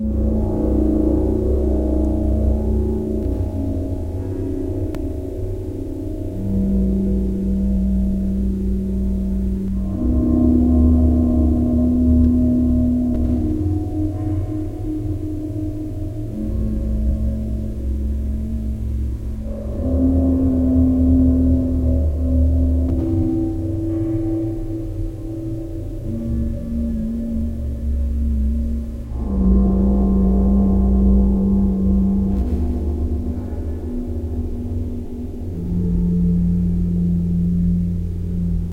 horror ambiance
guitar and piano armonics with echo and flanger effects